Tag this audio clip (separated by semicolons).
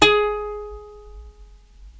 sample; ukulele